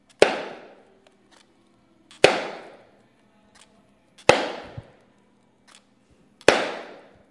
mySound JPPT6 MLaura
Sounds from objects that are beloved to the participant pupils at Colégio João Paulo II school, Braga, Portugal.